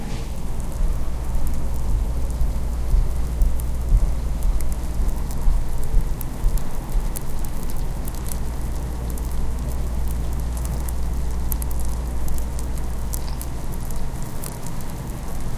Raw recording of sounds of tadpoles making bubbles recorded with Olympus DS-40 with Sony ECMDS70P.